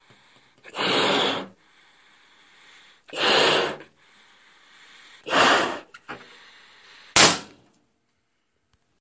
Inflating a balloon till it blows
Filling a balloon with air til it explodes.
air, explosion, funny, holidays, blowing, festival, party, Inflate, inflating, blow, balloon, holiday